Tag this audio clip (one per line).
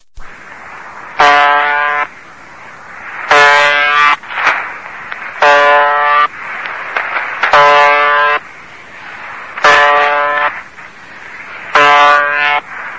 spooky
shortwave
recording
creepy